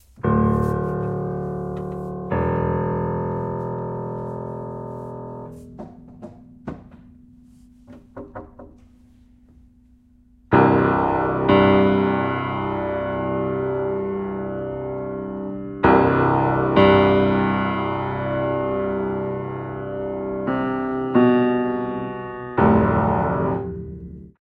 Upright Piano [B] Dark Low Random
Jam, Upright, Piano